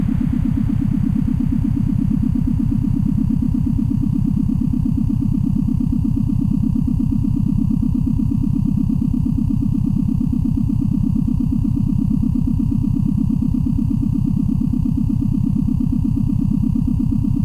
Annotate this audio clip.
wobbling sound, spaceship
electric, engine, fx, motor, sci-fi, spaceship